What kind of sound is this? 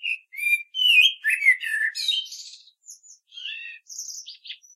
Morning song of a common blackbird, one bird, one recording, with a H4, denoising with Audacity.